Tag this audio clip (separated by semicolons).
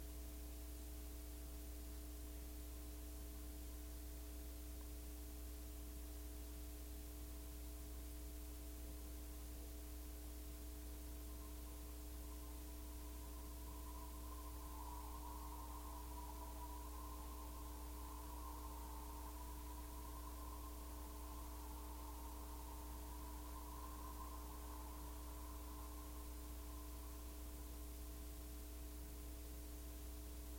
contact; contact-mic; contact-microphone; DYN-E-SET; field-recording; mains; mic; PCM-D50; power-hum; resonance; Schertler; Sony; wikiGong